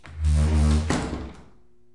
Wooden door scraping open
wood-door, door-open, door-scrape, door, wooden-door, wood-scrape